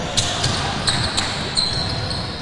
Basketball shoes 16
Squeaking noise produced by friction with the shoes and the wood floor.